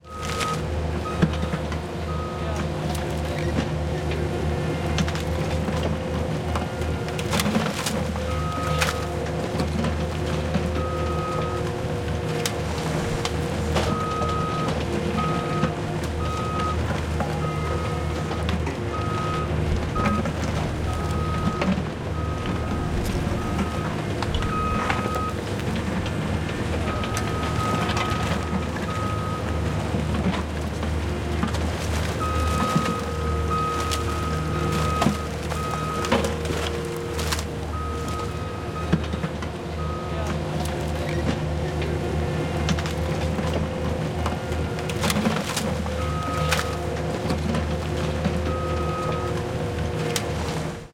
BULLDOZER EXCAVATOR Working
BULLDOZER EXCAVATOR BULL DOZER BACKHOE CONSTRUCTION debris removal BACKUP BEEP, good stereo recording of debris being removed.
EXCAVATOR, workers, debris, BEEP, BACKHOE